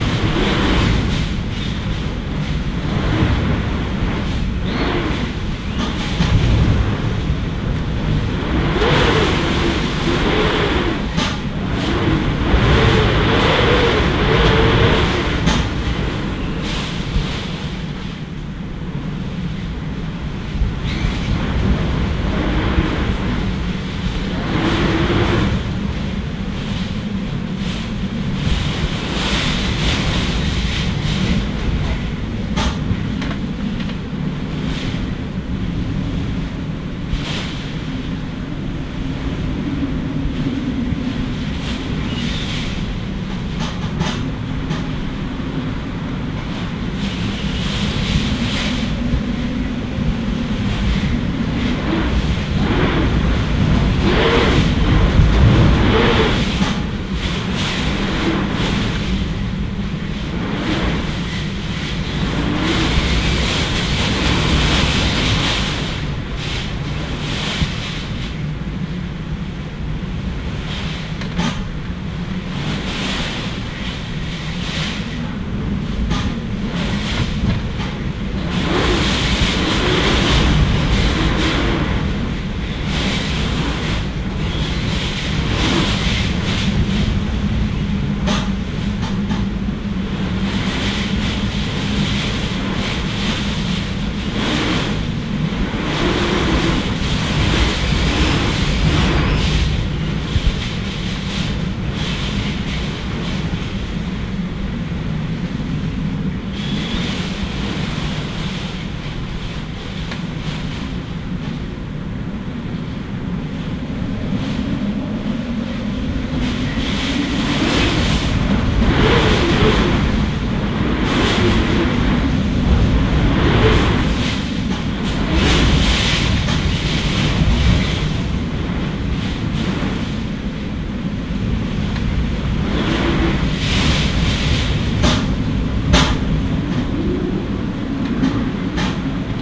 Wind and rain during taifun
Wind and rain recorded with ZOOM H2N in my appartment during a taifun in Yokohama.
taifun, rain, wind, field-recording, japan